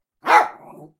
Dog Barking Single 1
Jack Russell Dog trying to bite something.
fighting, biting, attack, woof, lurching, barking, bite, animal, dog, Jack-russell, attacking, fight, woofing